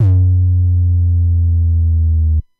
The Yamaha CS-15 is analog monosynth with 2 VCO, 2 ENV, 2 multimode filters, 2 VCA, 1 LFO.
cs-15, bd, drums, percussion, analog